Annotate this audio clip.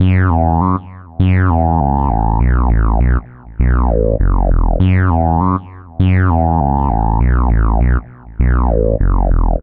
gl-electro-bass-loop-002
This loop is created using Image-Line Morphine synth plugin